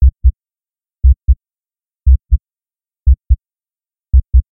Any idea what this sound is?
Firstly, I decided to generate a click track. I kept only two clicks, and I changed the pitch of each one (deep for both, and one a bit deeper than the other). I also eased the high-pitched and highlighted the low-pitched.
I deleted a little silence moment which was between the clicks, in order to have a rhythm similar to heartbeat.
Finally, periodically, I repeatedly duplicated this clicks.